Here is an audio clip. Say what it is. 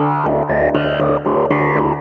Riff @ 120 BPM 01
Created in Ableton Live using a built in preset from the Tension instrument, layered with an external VST instrument and fed through Guitar Amp 2.0 Free Edition (by Plektron), followed by KR-Delay CM Edition.
This was just a short test loop, for the mellody.
synth, 120BMP, loop